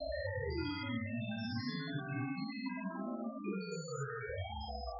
Sci-fi alien sound created with coagula using original bitmap image.
ambient, synth